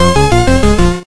j1game over mono
This is a C64 SID like Game Over Jingle. I hope, you like it and find it useful. You can even use this sound in your commercial production. Btw.: I'll create a Level Complete or Game Complete Sound in the near future too...
retro, commodore, mono, c64, over, sid, game, commodore-64, 64, jingle, sound, chip, computer